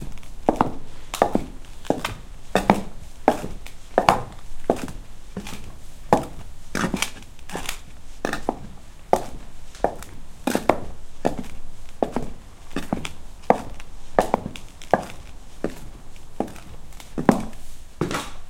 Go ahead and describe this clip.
walking with my wooden shoes on a concrete road. Equipment that is used: Zoom H5 recorder + Audio-Technica BP4025 Microfoon.